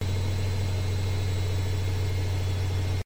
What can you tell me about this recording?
washing machine D (monaural) - Spin 5
washing-machine, high-quality, field-recording